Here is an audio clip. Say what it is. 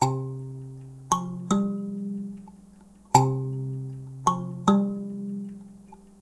kalimba for kids
Primitive african handmade kalimba. Recorded by Audiotechnica
african, instrument, kalimba, mbira, melodic, piano, thumb